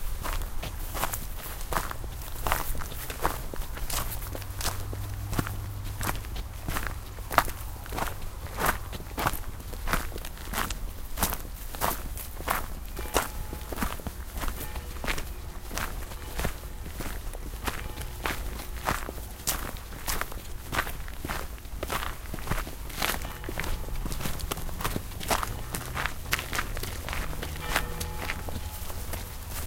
walking on gravel and dry land. cars passing and church bell on the background. Recorded with Minidisc, stereo electret microphone and cheap portable preamp.
competition, gravel, steps